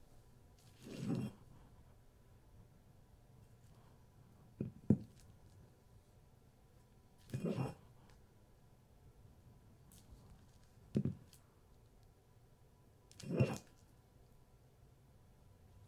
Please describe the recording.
Brick pick up and put down - laminate floor
Close mic, picking a brick up off the a laminate floor and then setting it down. Several takes. Audio raw and unprocessed.
brick, bricks